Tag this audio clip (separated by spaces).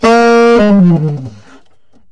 sax woodwind jazz sampled-instruments saxophone vst tenor-sax